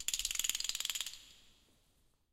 DRUMS CASTANETS SHAKE 1
castanets, drum, percussion